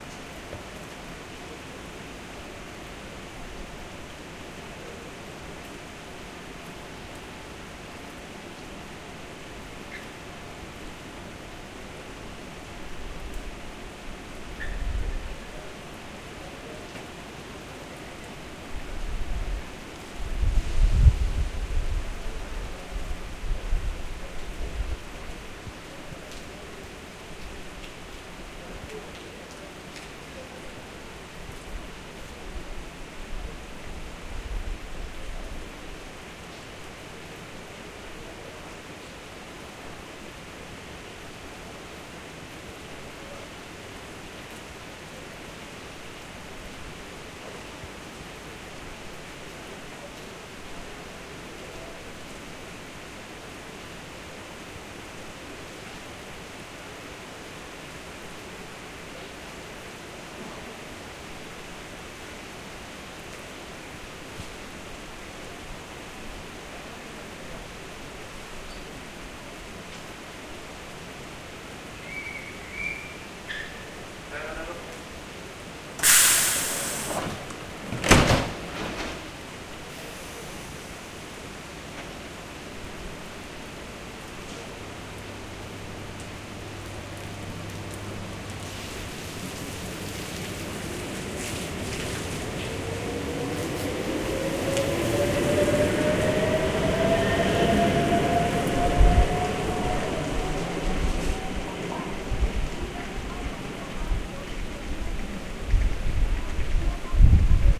Field recording from Oslo Central Train station 22nd June 2008. Using Zoom H4 recorder with medium gain. On a platform, heavy rain, Local train departs.
atmosphere, norway, norwegian, train, train-station